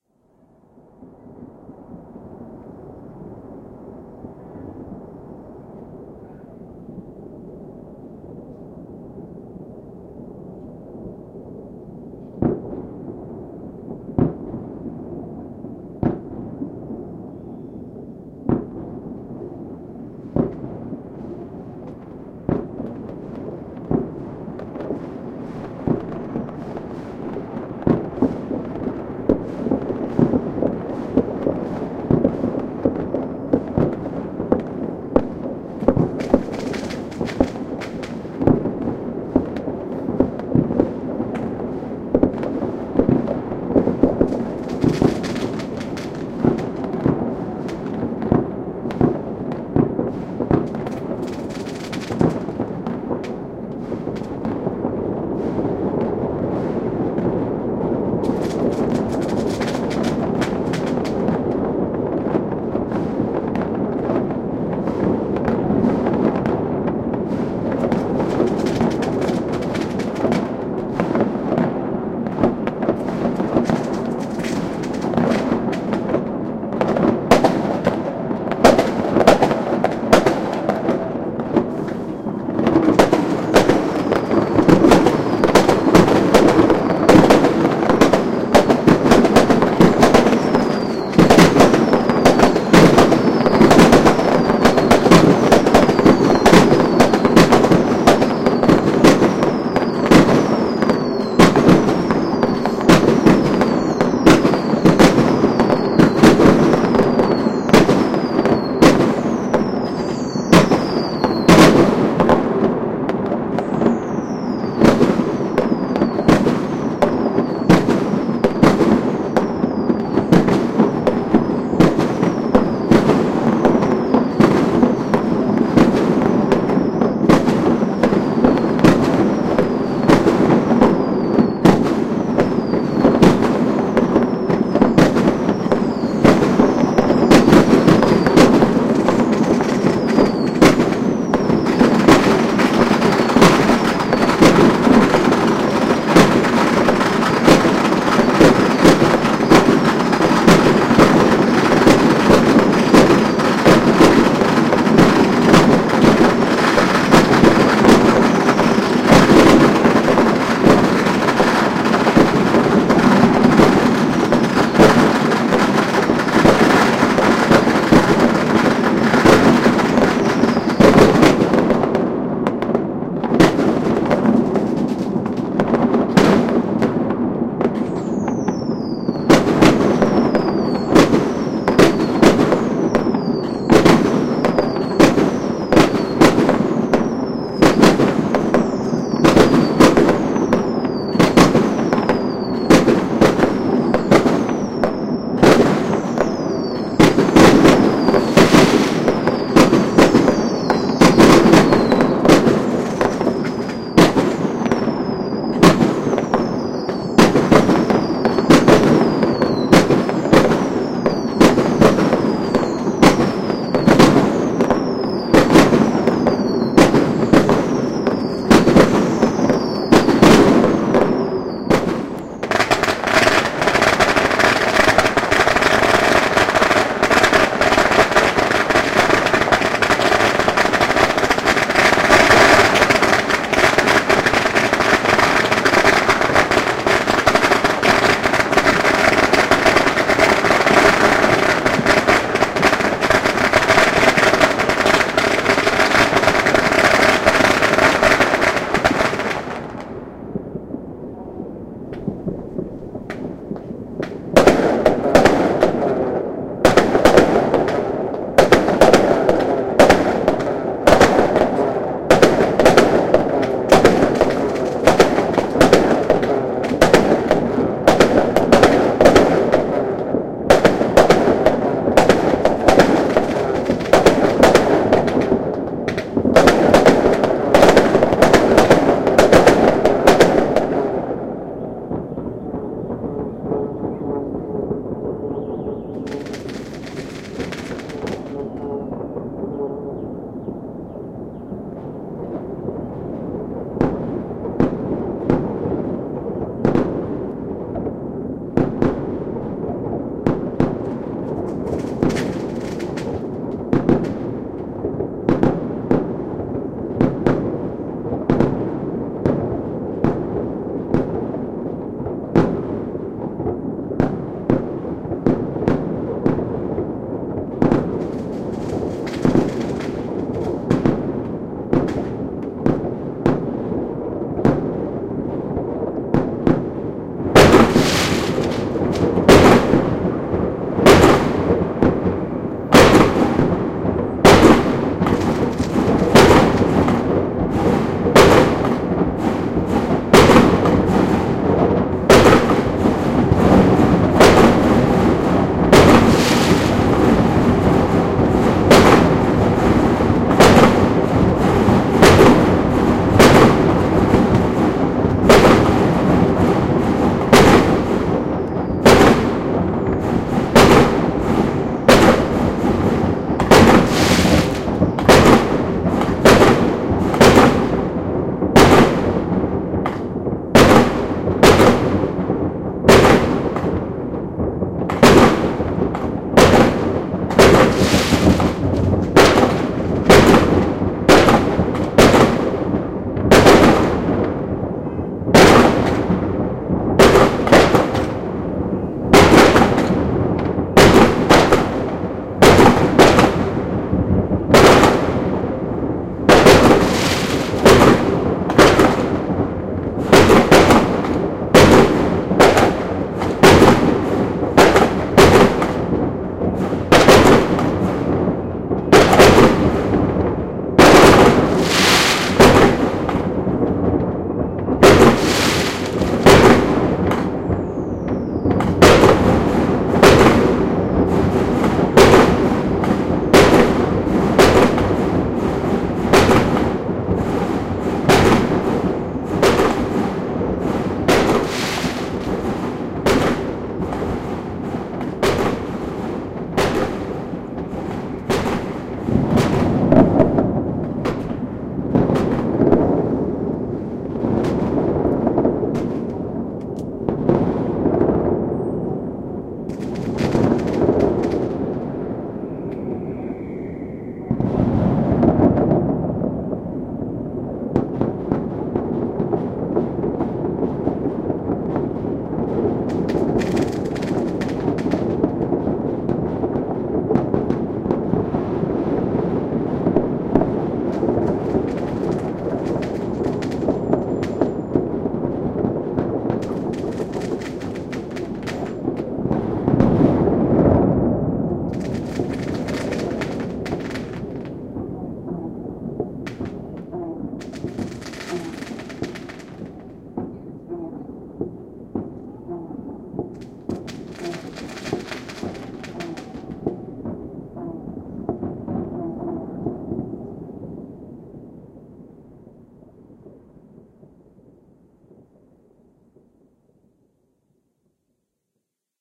Chinese Fireworks - New Year Celebration
Lunar New Year Eve is the craziest night in China. The start of the Spring Festival could sound like the trumpets of armageddon to foreigners. Any kind of firecracker or pyrotechnic is used for anyone in every corner all around the country. The government allows everything, except long range missiles -I'm exaggerating here-, but considering you have 1,500 billions of partygoers, many of them heavily intoxicated with baijiu, in cities like Beijing where I recorded this, 2 hours before the midnight and then during the incredible loud first minutes of the new year -this 2017, the celebrations began on January 27- you are compelled to remember who were the inventors of the gunpowder and the firecrackers. Recorded with a ZOOM H2, I edited 8:29 minutes of explosions; in this segment, you will hear far away aerial bombs and very close salutes.